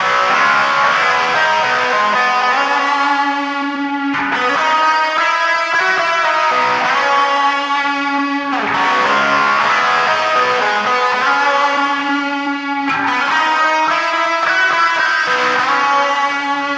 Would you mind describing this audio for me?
gibson guitar distorsion line